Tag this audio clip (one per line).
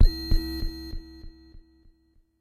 100bpm
electronic
multi-sample
synth
waldorf